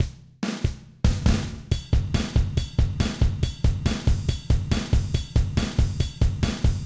rock1 intro 140bpm
Simple 4 bars rock intro
drums, rock